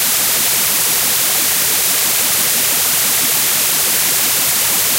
Independent channel stereo white noise created with Cool Edit 96. Alternate flanger effect applied.